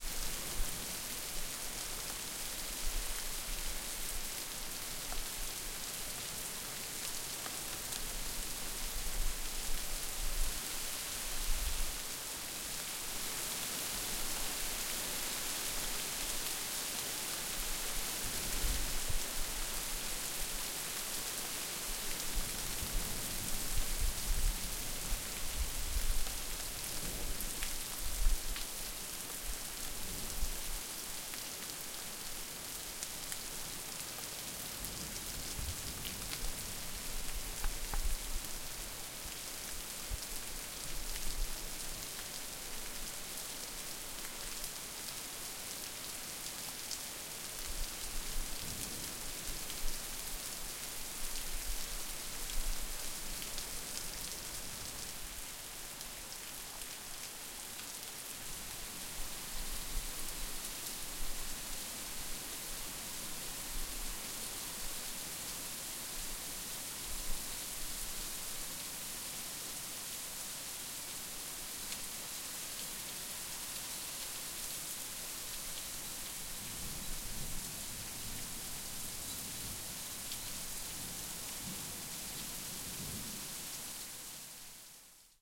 Heavy Rain UK Cambridge 2
Recording of really heavy rain.
Equipment used: Zoom H4 recorder, internal mics
Location: Cambridge, UK
Date: 16/07/15